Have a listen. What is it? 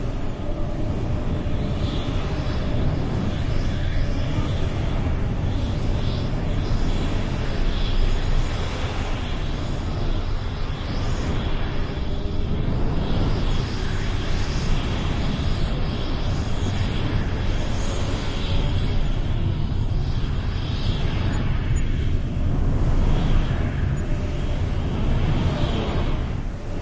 Ambience BlackHole 00
A somewhat windy ambient looping sound to be used in sci-fi games. Useful for portals, black holes and other stuff that sucks you in.